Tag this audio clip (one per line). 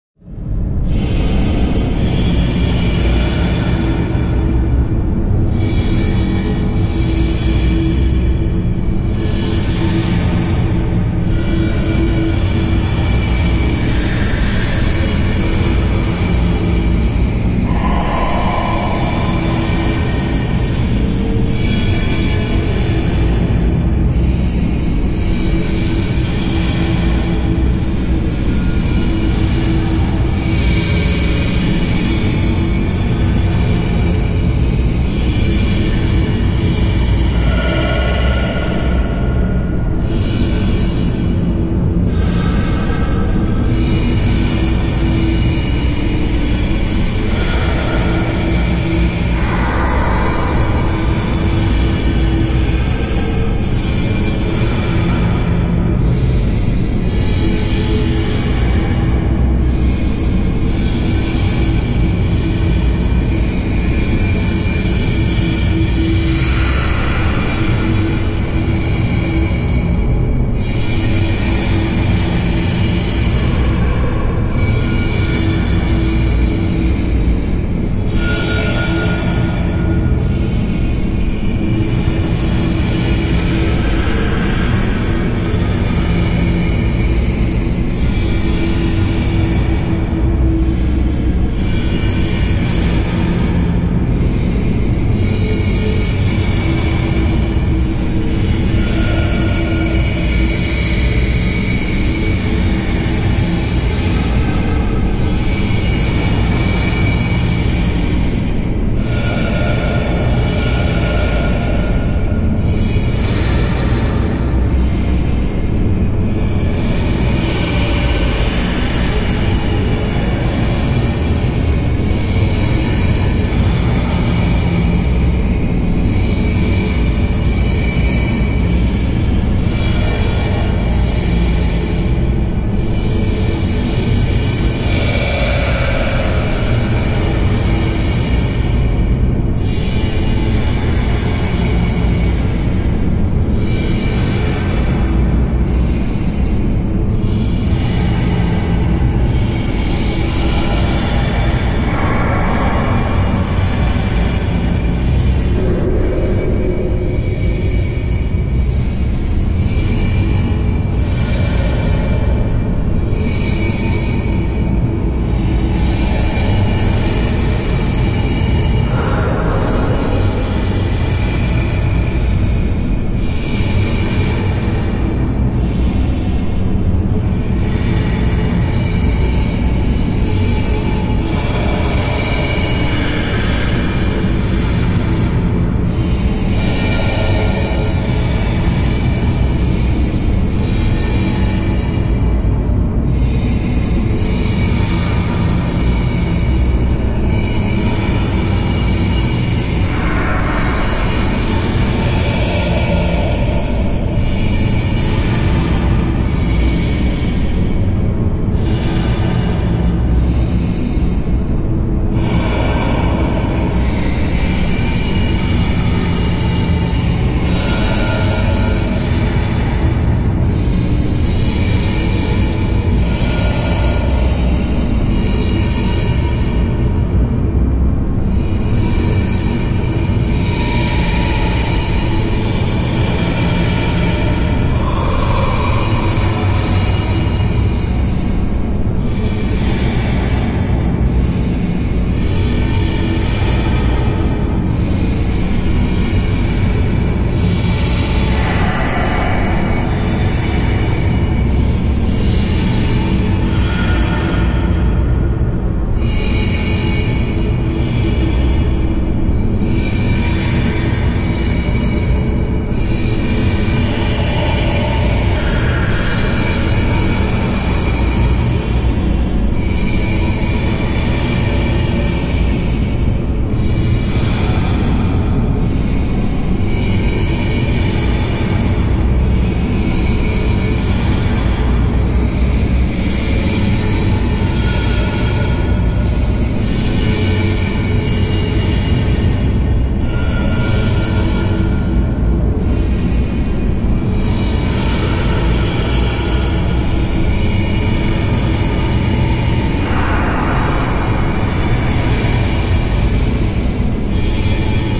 ambience
atmosphere
background
dark
deep
drone
machines
soundscape